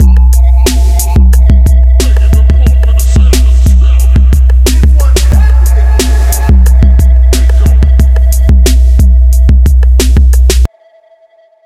This is part of a small drum pack hip hop beats